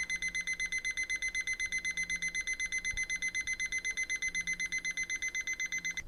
This sound is part of the sound creation that has to be done in the subject Sound Creation Lab in Pompeu Fabra university. It consists on an alarm clock ringing
campus-upf, Alarm, UPF-CS14, Ringing, ring, Clock, AlarmClock